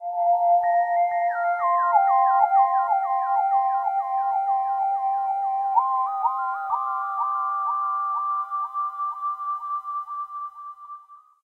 flutey loop
filtered flute for loopn
filter, flute, loop